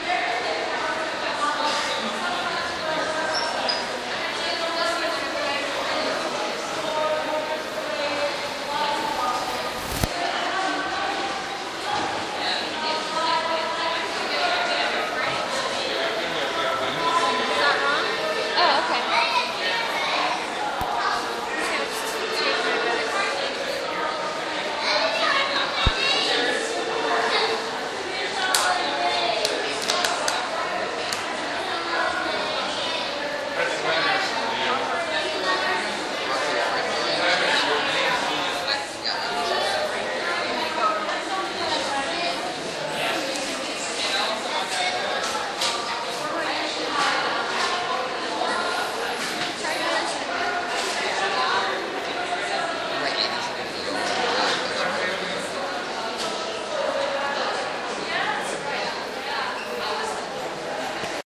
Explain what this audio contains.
washington americanhistory lobby
Inside the lobby at the American History Museum on the National Mall in Washington DC recorded with DS-40 and edited in Wavosaur.